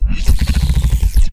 Transformer Slowdown 02
Transformer-inspired sound effect created with a contact microphone and a guitar pickup on various materials and machines.
electric, future, movement, effect, robot, sfx, technology, sci-fi, tech, mechanic, transformer, sound-design, digital, sounddesign